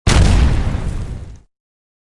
Medium Explosion
Nice little Explosion sound that I made
Combat, Bomb, Explosion, Destruction